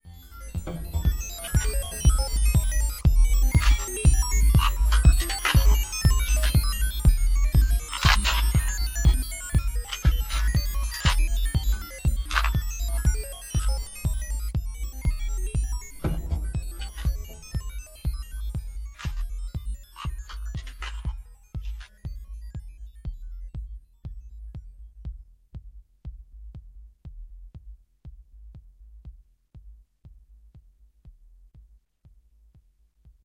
Lucifer beat
Spacey beat with a sample of taking matches out of a box.
spacey, matches, rhythm, chorus, beat